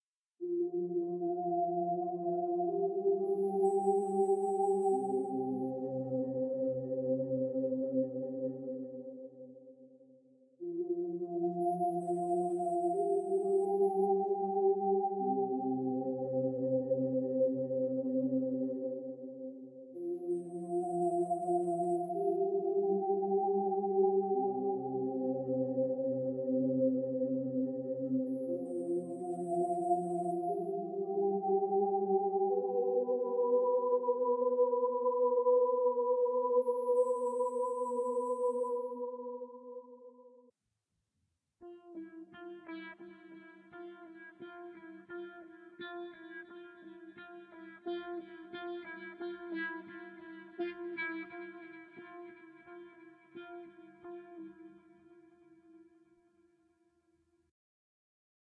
Space synth with guitar. Dreamlike. Waking up but now in space.
effect, electronic, future, fx, noise, sci-fi, sound, sound-effect, space, synth, wave